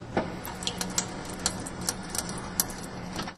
The (albeit lo-fi) tune
of a water fountain being used.